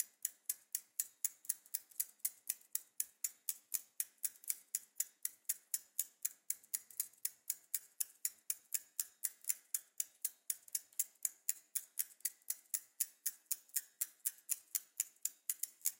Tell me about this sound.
120BPM Bicycle Chain loop (processed with gate)
This loop was recorded at home with Zoom H4n and my bicycle's chain, you all know this sound probably )
Then it was quantized and processed with eq and gate plugins.
There is also dry version of this loop (without gate) in this pack.